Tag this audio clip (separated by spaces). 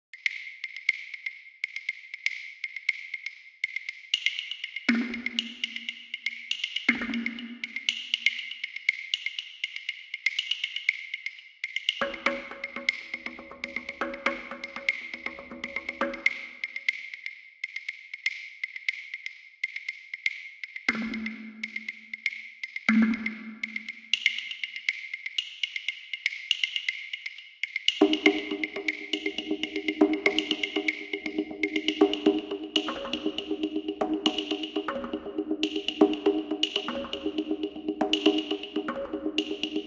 Soundscape World Dark Ethno Cinematic Drum Ambient Drums Music